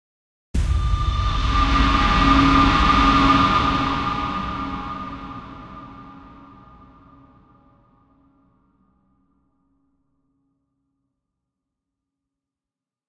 Created with Absynth 5, Damage and processed/layered through Alchemy.